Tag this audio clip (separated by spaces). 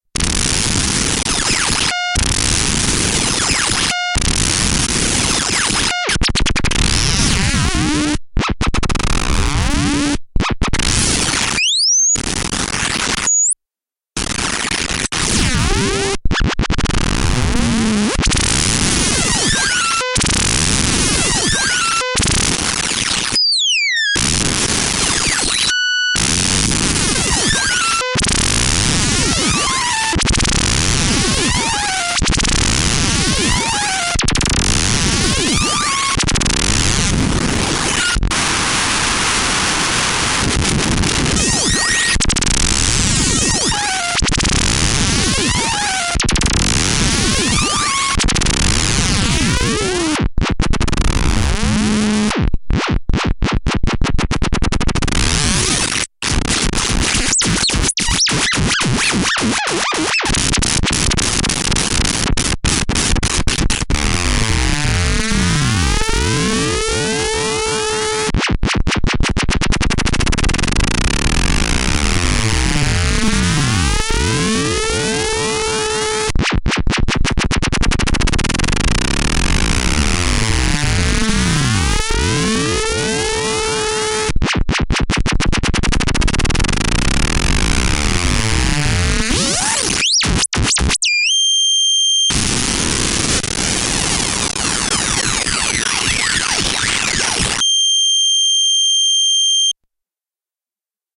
weird interesting bent fun synthesizer spacy circut